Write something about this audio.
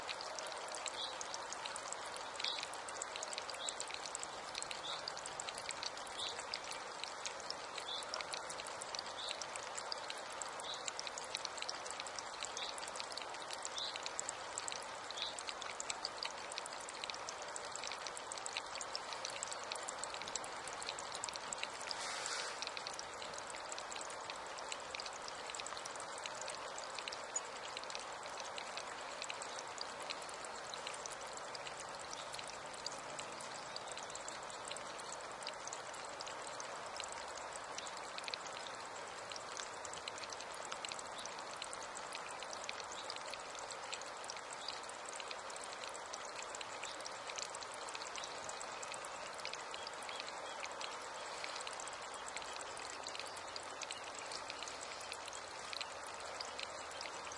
110517 15 filtered clicky sounds in the moss2
Near the sea in West Scotland, by the side of a quiet road in a marshy patch, I noticed this localised clicking chatter sound. Other sounds of birds etc can be heard in the background.
Recorded with internal mics of Sony PCM-M10 and high-pass filtered - possibly slightly over-aggressive in the filtering.
animal, click